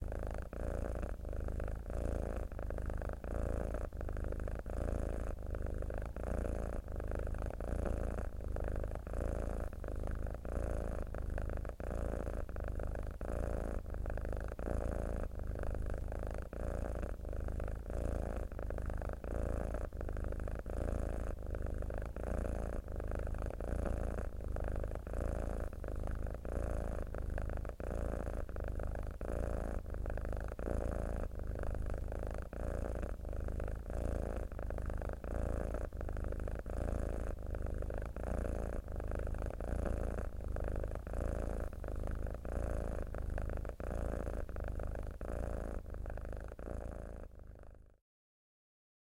purring happy cat
My lovely cat Nikki :)
black, purr, cat, purring, animal, meow, domestic, kitten, pet